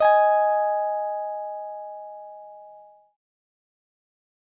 This is one note from my virtual instrument. The virtual instrument is made from a cheap Chinese stratocaster. Harmonizer effect with harmony +5 is added